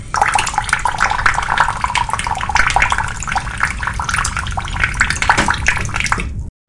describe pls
Variation of running water in a sink
faucet, running, water